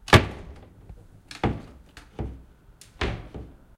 closing-closet
the doors of a cheap ikea closet.